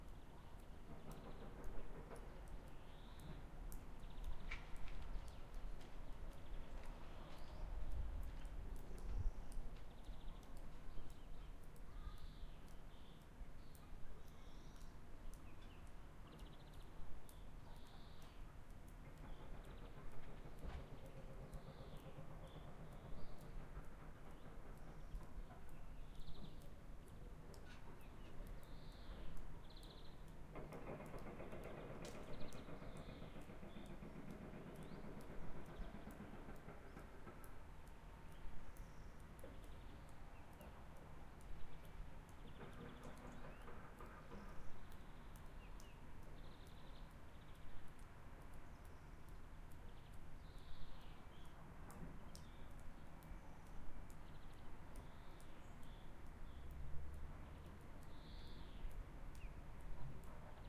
outside general noise
general outside noise in winter landscape
winter, outside, general, noise, nature